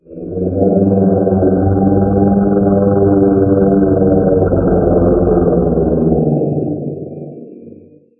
Creature in da cave
I wanted to create a dinosaur-like roar, but instead I came up with this. I thought it sounded good so I kept it and uploaded it. This was also the base for my Chitter Ambiances that I created.
cave, creature, creepy, echo, monster, roar, tense